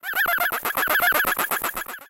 I made this sound in a freeware VSTI(called fauna), and applied a little reverb.
synthesized, animals, creature, animal, alien, synth, critter, space